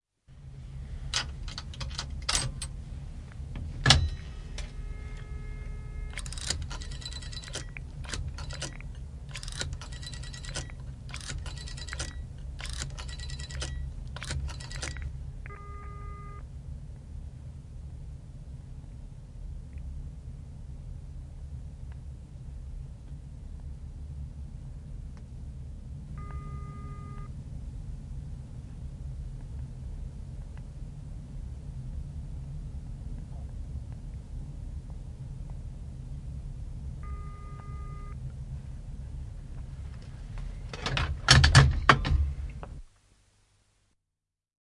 Puhelinkoppi, soitto, hälytys / Telephone booth, old phone box of the 1970s, coins into the slot, receiver, pick up, dial, alarm, hang up
Puhelinkoppi, 1970-luku, kolikot aukkoon, valintalevy, hälytys, luuri alas.
Paikka/Place: Suomi / Finland / Helsinki, Suomenlinna
Aika/Date: 05.06.1972
Alarm, Dial, Field-Recording, Finland, Finnish-Broadcasting-Company, Luuri, Numeronvalinta, Phone, Phone-box, Puhelin, Puhelinkoppi, Receiver, Soundfx, Suomi, Tehosteet, Telephone, Telephone-booth, Valintalevy, Yle, Yleisradio